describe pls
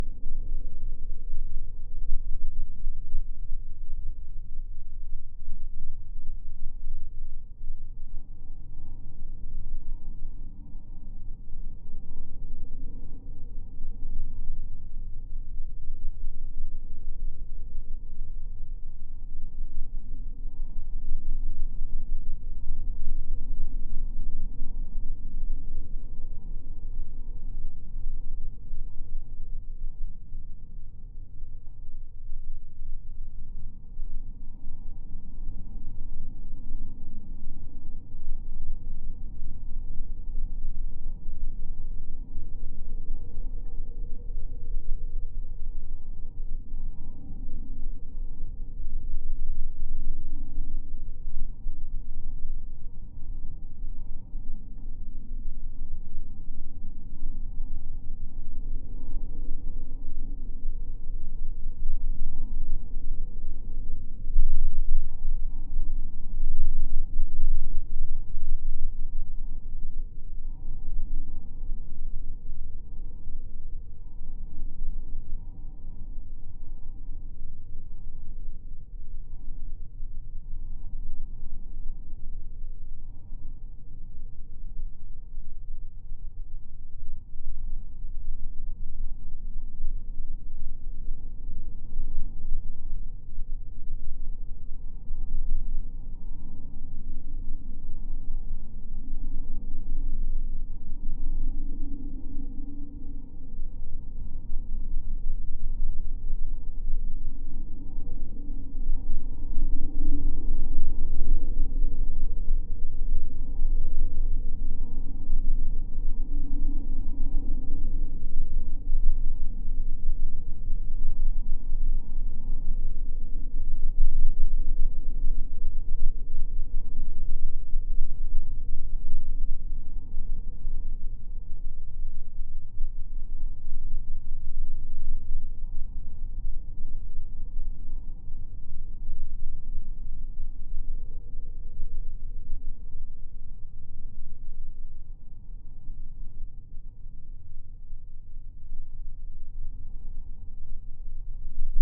(GF) Metal wire fence resonating in the wind
I attached a Geofon to a metallic fence securing a radar installation in Paljassaare Estonia. You can hear how the wind affects the overall structure, making it resonate.
Recorded on a MixPre6.
rumble, wind, contact, geofon